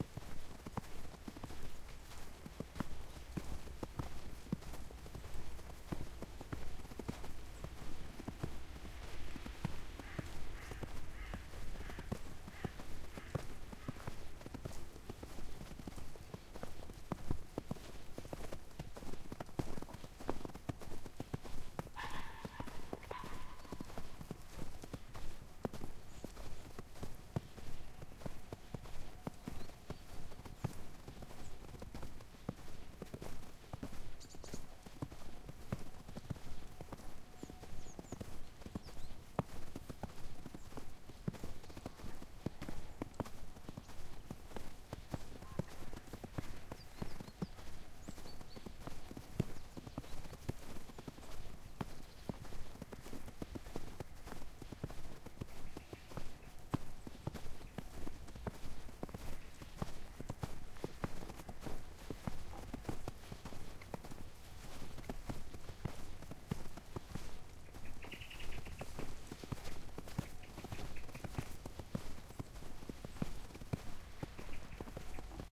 footsteps in the snow birds and dog
I walk thru the snow, you can hear some birds and a dog.
footstep, bird, footsteps, birds, snow, dog, walk, field-recording, wet